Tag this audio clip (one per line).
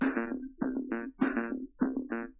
bass
dance
electro
industrial
loop
minimal
simple
techno